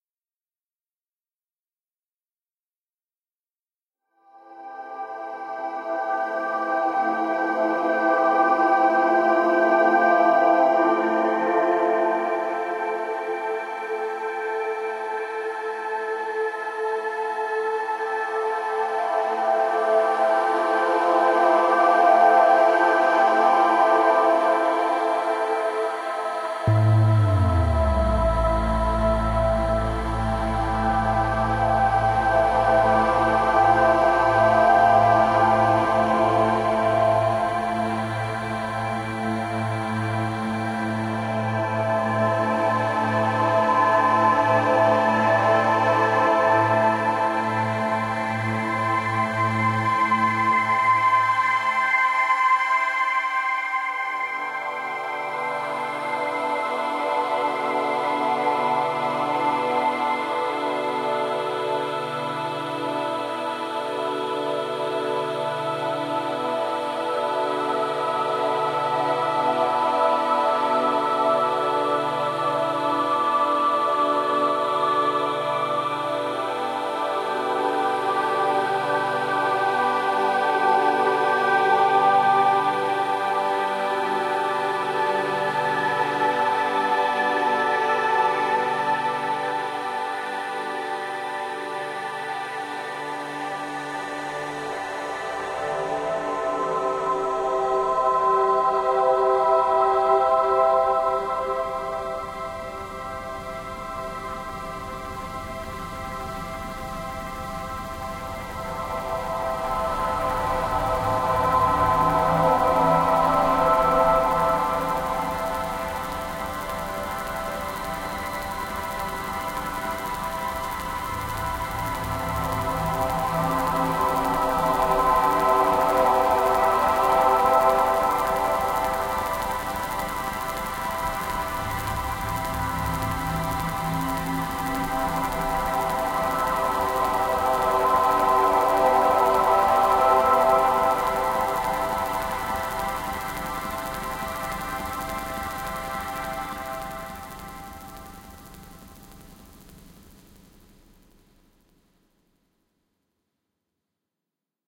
laser surgery
A dynamic dark ambient soundscape with mysterious technologic vibe.
film, free, surgery, ambient, electronic, soundscape, soundtrack, medicine, game, cyber, movie, cyberpunk